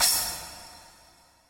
Free drum sample processed with cool edit 96. Splash cymbal with reverb.